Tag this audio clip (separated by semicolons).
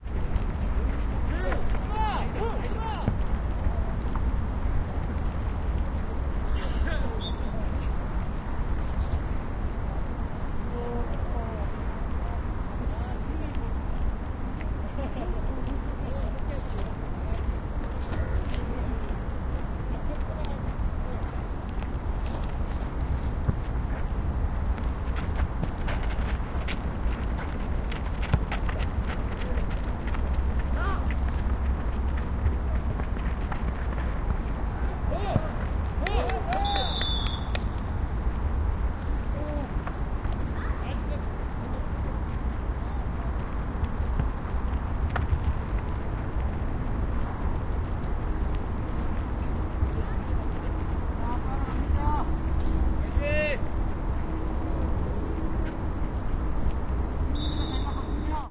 korea; field-recording; voice; seoul; truck; korean; traffic